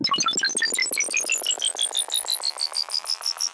Alien Bomb Timer
I was just browsing around and I found this amazing sound that I edited to make it sound like a bomb about to go off. The first beep is the original and the rest I changed the pitch for.
Alien, Alien-Bomb, Alien-Bomb-Timer, Alien-FX, Alien-Life-Form, Alien-Sound-Effects, Beep, Bleep, blip, Bomb, Bomb-Timer, Countdown, Extraterrestrial, FX, High-Pitch, JarAxe, Pitch, Science-Fiction, Sci-Fi, Sound-Effects, Timer, UFO